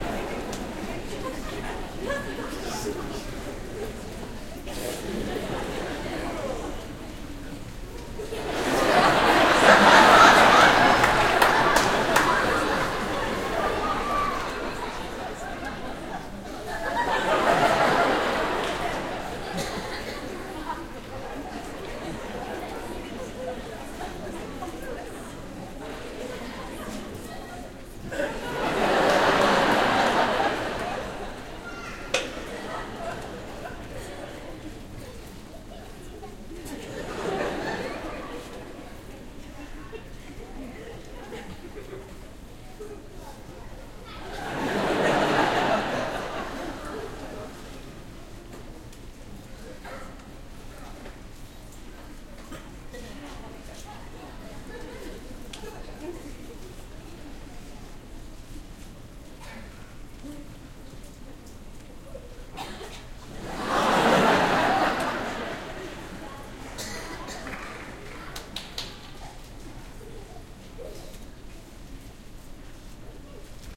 Crowd Laughing
A crowd of 3600 people laughing at a show in the Atlanta Fox Theatre. Recorded from the control booth.
Atlanta, field-recording, crowd, H6, Laughter, 3600, people